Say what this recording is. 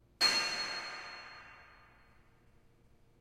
Here's one from a series of 'clang' sounds, great for impact moments in trailers & commercials, or to layer up with other sounds. They are somewhat high-pitch, so they might mix well with low frequency drums and impact sounds.
Recorded with Tascam DR-40 built-in-mics, by hitting a railing with a pipe in a stairwell and adding a little bit more reverb in DAW.
clang, hit, impact, reverb, steel